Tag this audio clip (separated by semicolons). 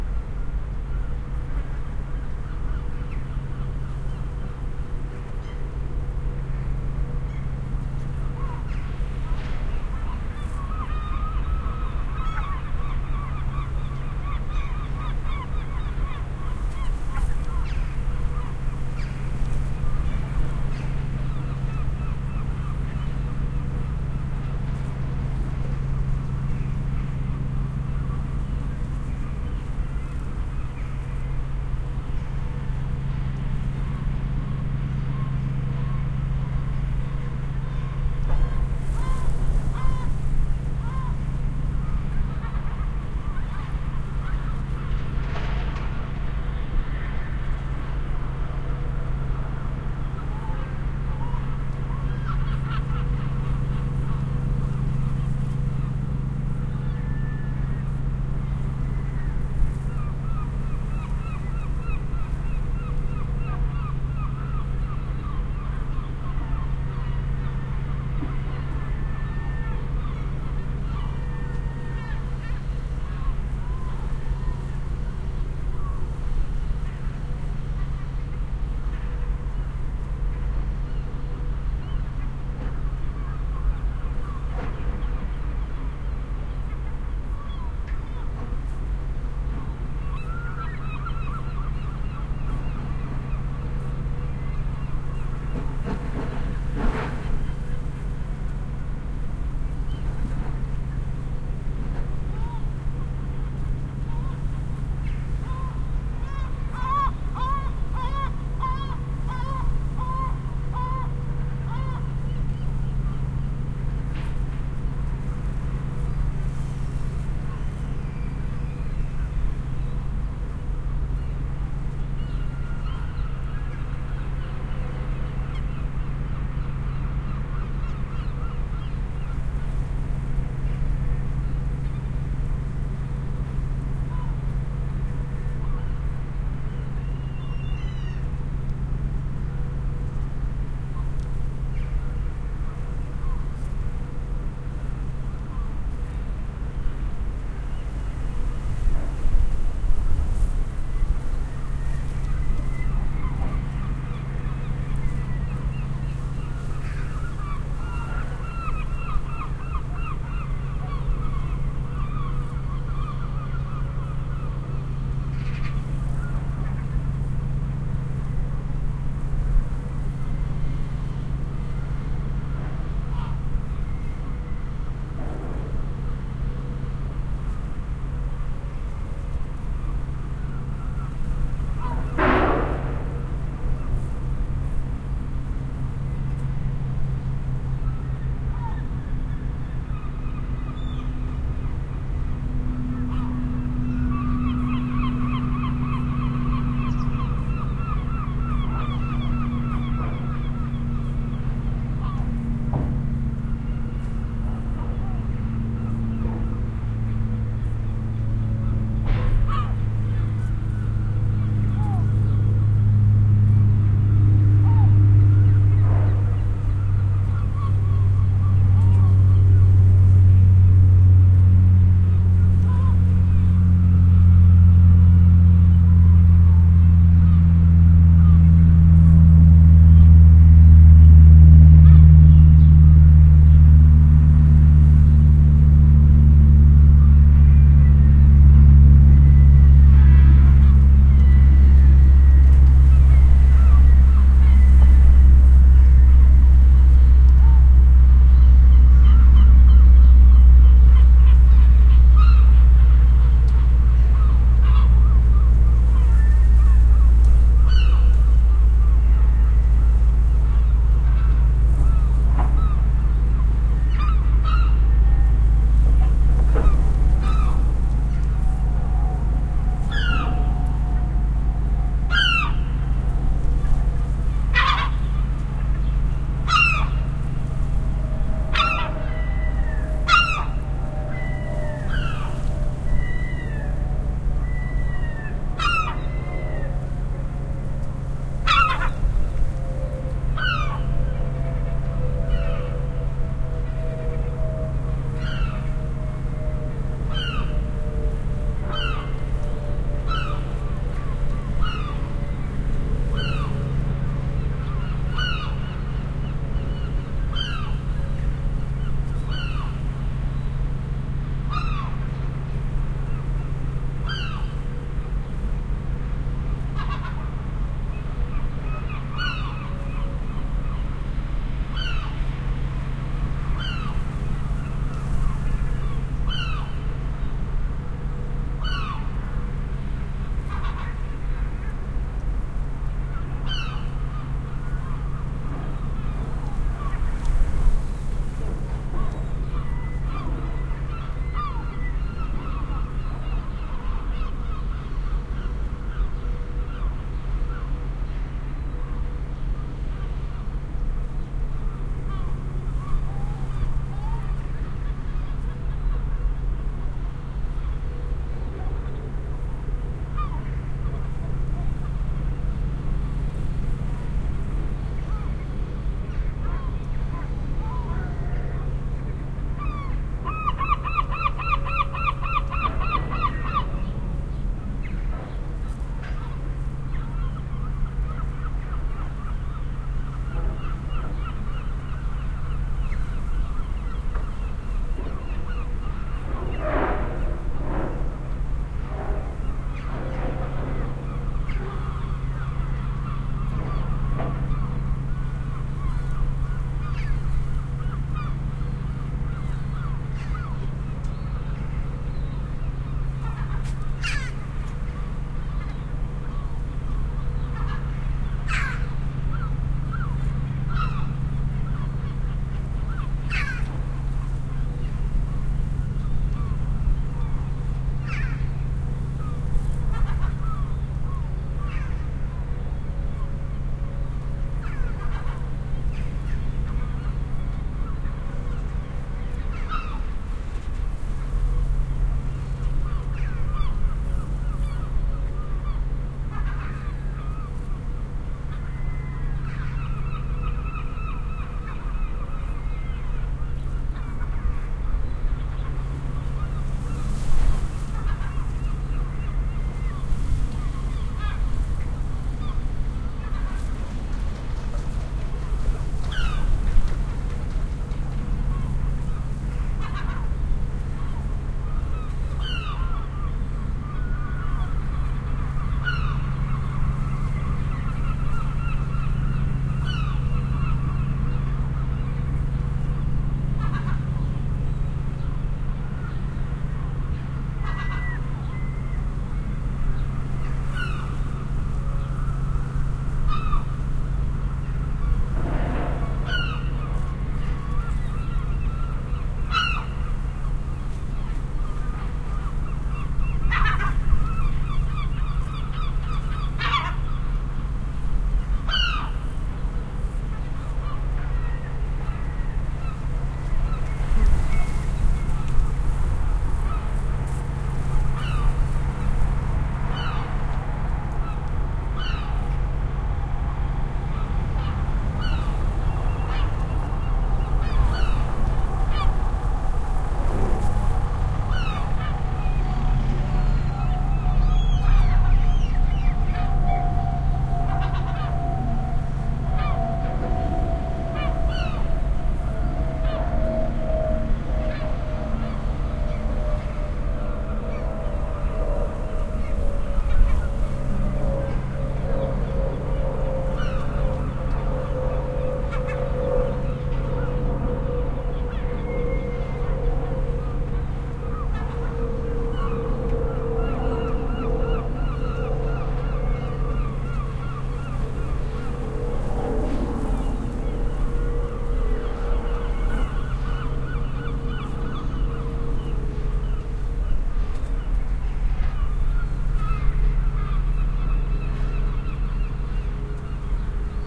field-recording; harbour; ijmuiden; netherlands; seagulls; ships